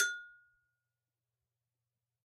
gbell 7-2 ff
recordings of 9 ghanaian double bells. Bells are arranged in rising pitch of the bottom bell (from _1 to _9); bottom bell is mared -1 and upper bell marked -2. Dynamic are indicated as pp (very soft, with soft marimba mallet) to ff (loud, with wooden stick)
bell, double-bell, ghana, gogo, metalic, percussion